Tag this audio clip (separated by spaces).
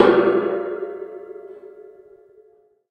alert big bizarre cell audio cup dream dreamlike hand converters huge design compact echo enormous edit disc dark industrial cool hands evil cd group contact gigantic impact ball frontier impulse